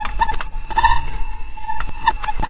manipulated Recording of a table squeaking